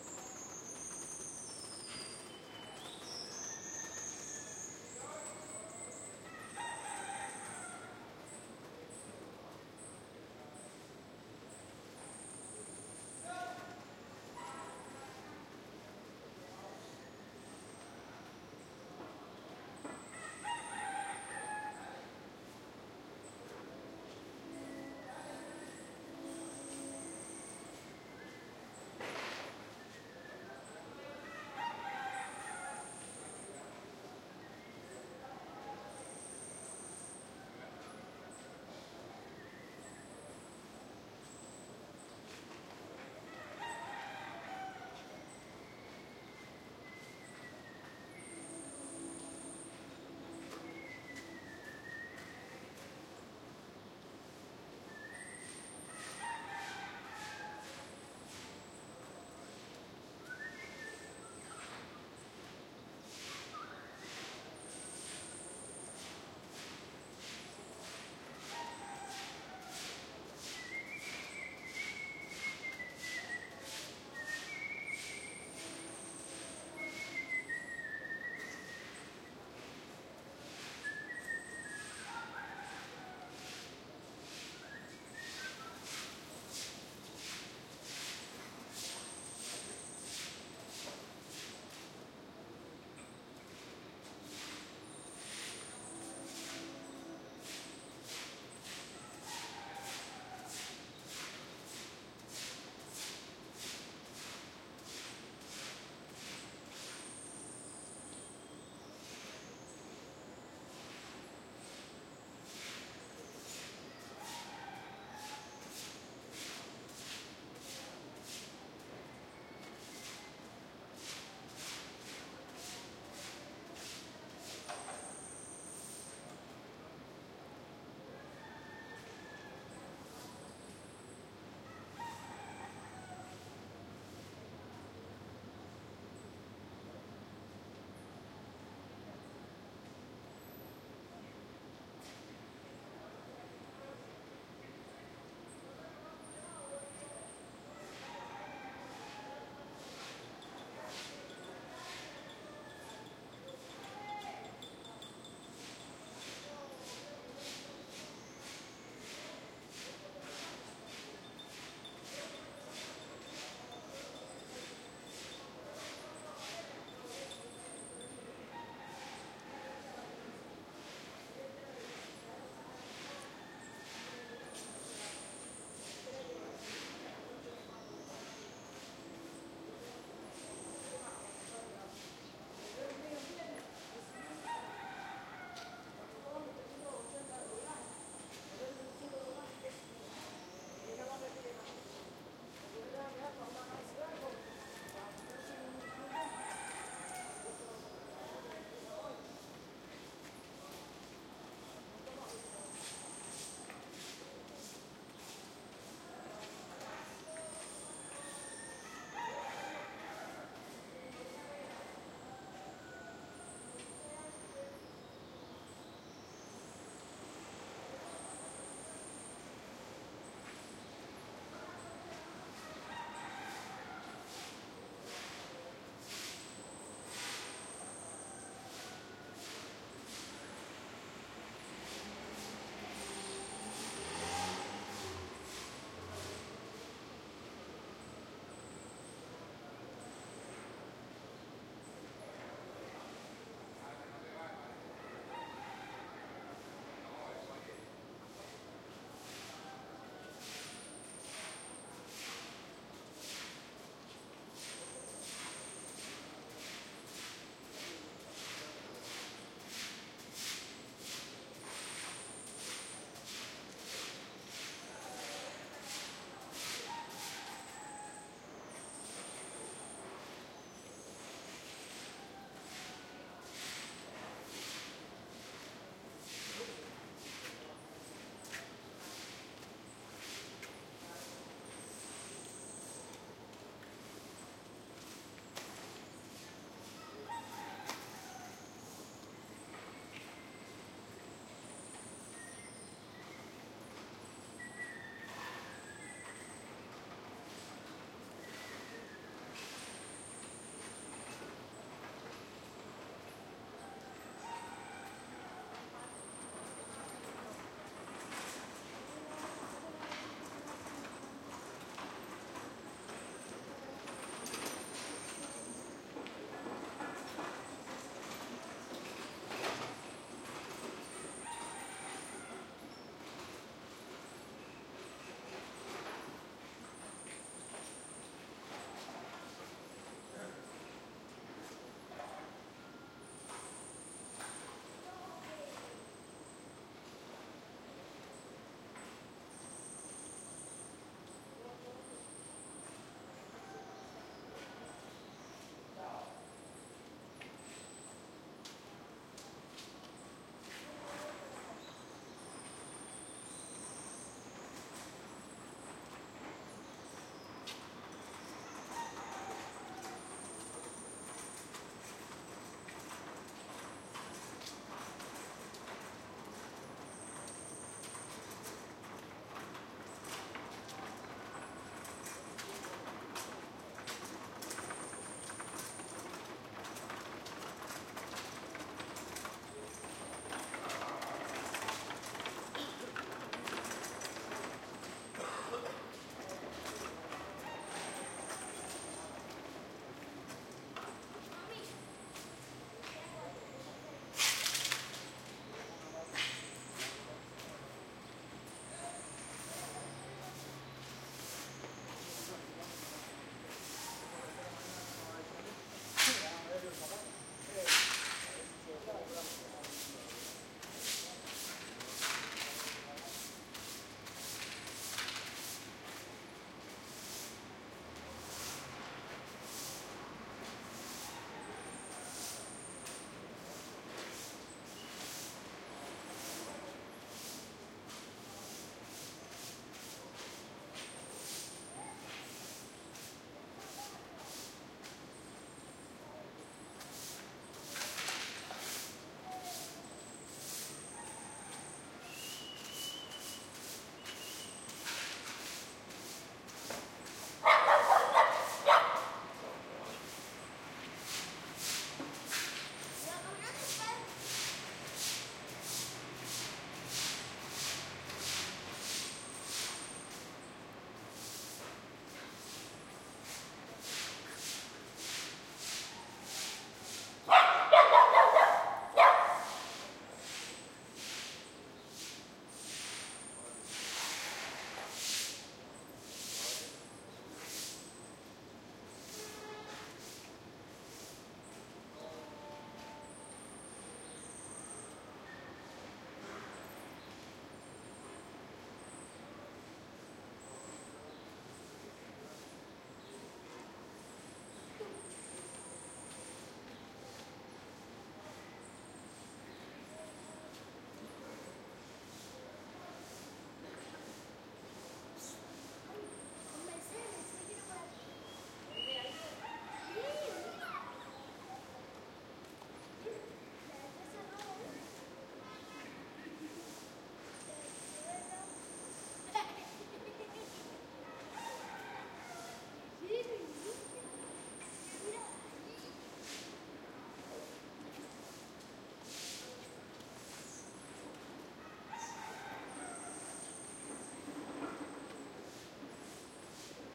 town early morning light activity from balcony city roar sweeping rooster dog bark end Havana, Cuba 2008
town early morning light activity city roar sweeping rooster dog bark end Havana, Cuba 2008